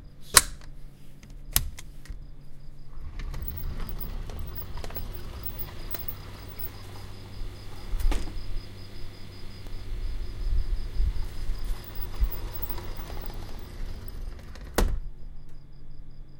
A sliding door being opened and closed in a questionably dank hotel room in Aiya Napa, Cyrpus (plus bonus crickets). Had to tell my travel mate to shut the hell up since he doesn't understand the process of field recording.
ambience, balcony, Door, foley, sliding-door